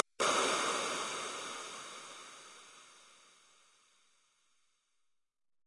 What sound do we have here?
Klick Verbs-41
This is a random synthesized click-sound followed by a reverb with 200 ms pre-delay. I used Cubase RoomWorks and RoomWorks SE for the reverb, Synth 1 for the click and various plugins to master the samples a little. Still they sound pretty unprocessed so you can edit them to fit your needs.
click, crash, digital, downlifter, fx, impuls-response, reverb, roomworks